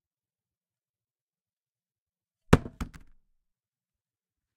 Revolver Drop 2
gun is dropped on hard surface
impact, hit